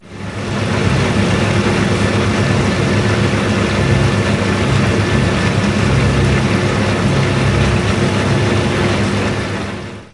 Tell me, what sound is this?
Noise of the fountain motor
In Tallers Building at Poblenou Campus (UPF) in the vending machine area.